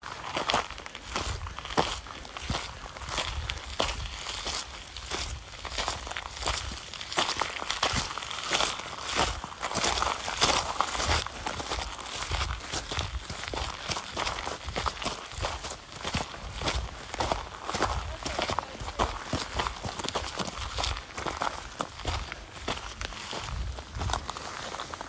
Walking on a back road in rural Vermont.